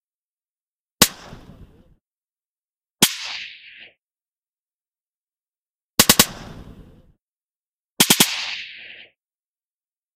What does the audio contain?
Silenced sniper rifle shots. Single shots and modeled bursts by repeating the single ones 0.1s (not realistic). Expected them to be not so loud? Movie mystery solved!